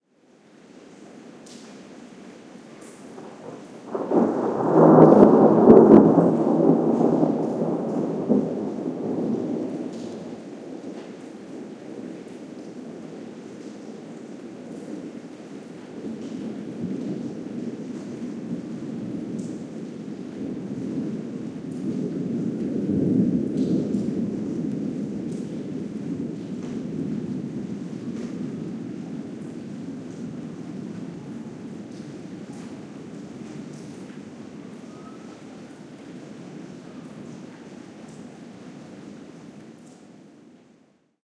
Soft rain and thunder, an ambulance's siren can also be heard. Primo EM172 capsules inside widscreens, FEL Microphone Amplifier BMA2, PCM-M10 recorder